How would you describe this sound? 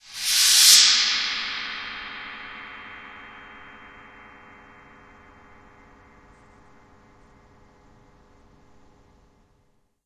Coins Scraping Cymbal
cymbal, scrape